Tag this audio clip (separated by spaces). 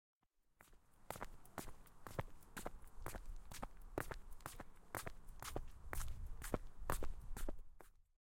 cz czech panska walk walking